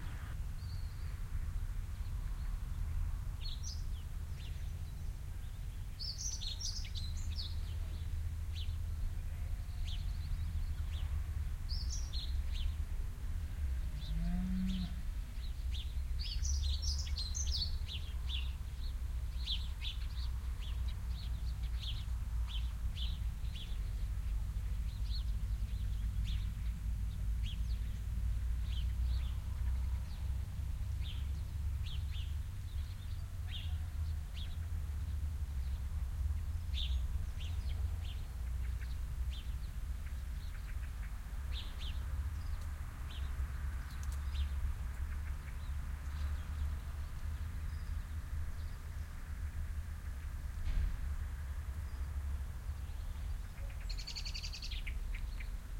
birds in moms garden
The birds whistling in my moms garden. In the background you can hear some cars. The wind is moving the trees. Binaural microphones into minidisk.
car, birds, garden, field-recording